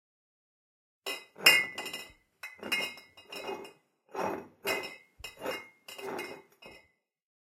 Putting a glass bottle on the ground.